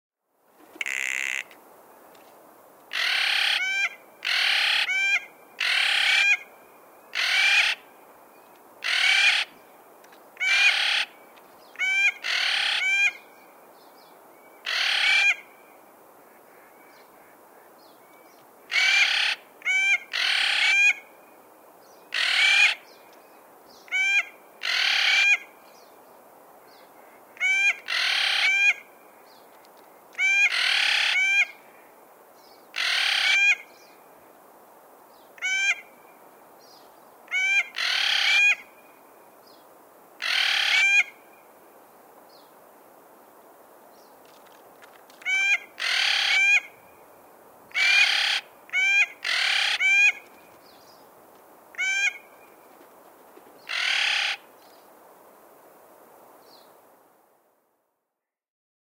Clark's Nutcrackers 02
Some Clark's Nutcrackers socializing in a tree on the side of a mountain at approximately 7500 feet.
Recorded with a Sennheiser 8060 into a modified Marantz PMD661.
field-recording, clarks-nutcracker, nature, geotagged, outside, bird-call, birds